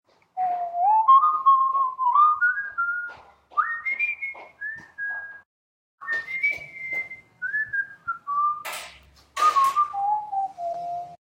Whistle Project 5
I did some whistling and effected the speed, pitch, and filters in a few ways